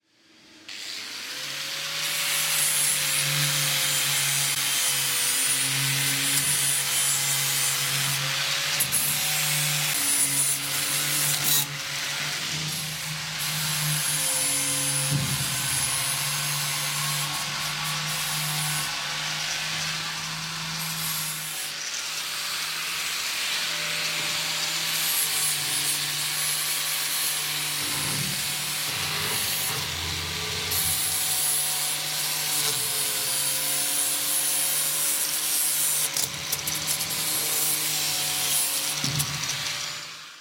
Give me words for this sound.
Grinder Hand type Small large tank
Electric hand grinding process. Working on large steel tank
Industrial, Metalworking, Factory, field-recording